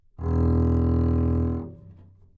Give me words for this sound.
Part of the Good-sounds dataset of monophonic instrumental sounds.
instrument::double bass
note::E
octave::1
midi note::28
good-sounds-id::8633